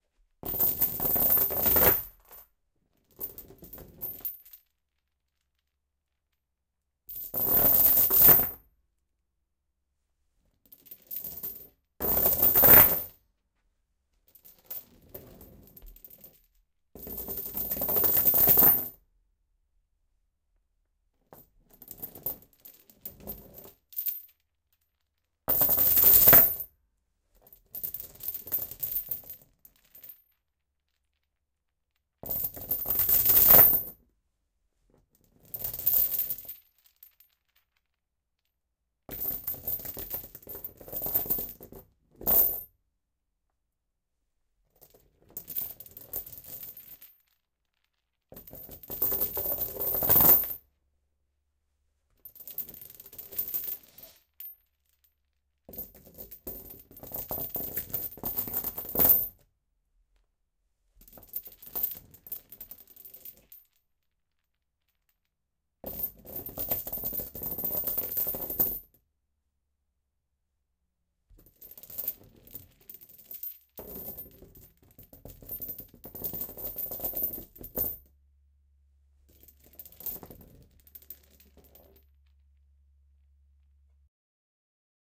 Slow Chain Drops
Me dropping a chain somewhat slowly on a linoleum floor. It starts loud and gets softer. It's also pretty bright, but most of that is around 14k if you want to EQ it out. Recorded with two Kam i2's into a Zoom H4N.